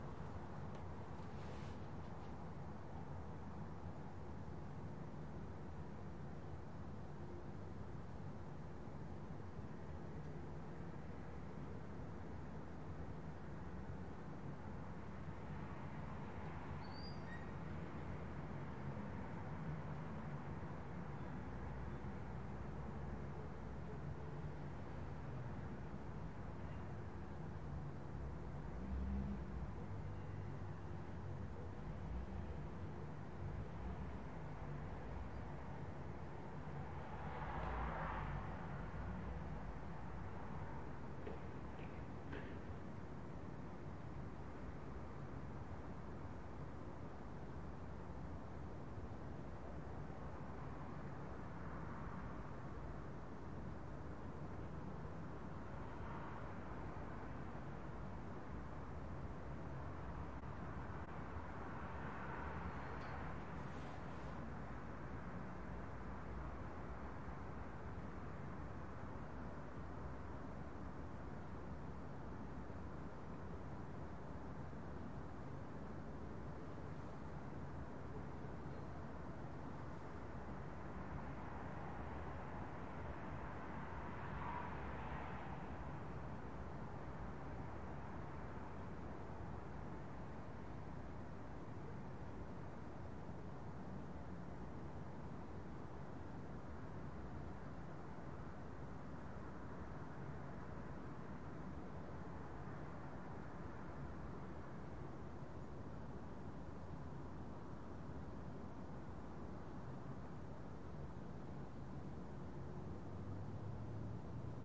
Distant city evening traffic, 5th floor balcony